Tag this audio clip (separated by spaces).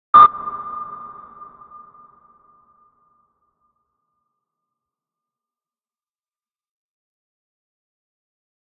aquatic fx high processed sonar submarine under-water water